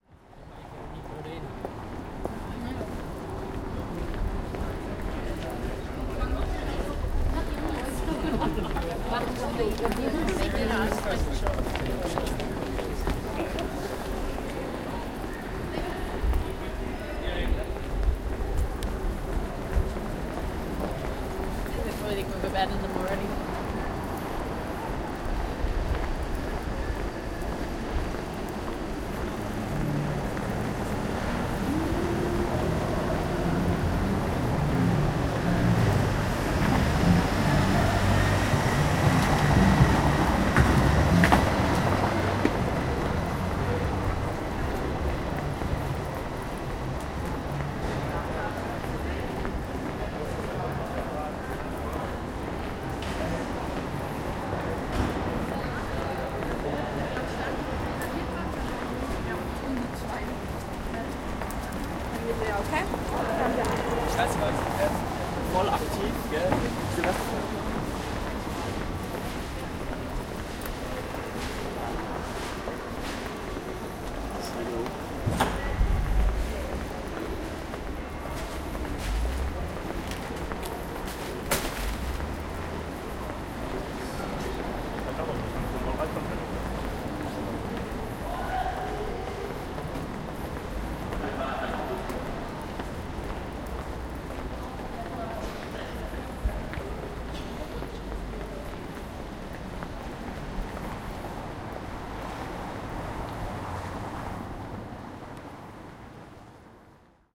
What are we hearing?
Pague, Staro Mesto, City Center part.1
Prague city center recorded with Zoom H-1 on 21th December 2013.